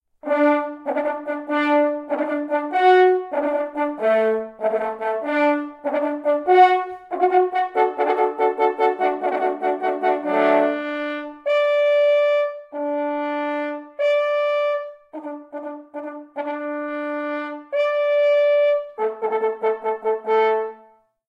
An exciting hunting horn call in D major for 4 horns, from the beginning of Haydn's 31st Symphony "Hornsignal." Recorded with a Zoom h4n placed about a metre behind the bell.